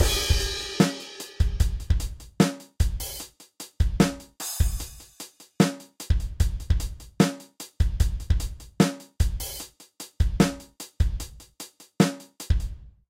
basic drum loop
Whatever style you want. Cool and realistic, with some bass stepping.
basic, 100, loop, bpm, realistic, drums, slow, rock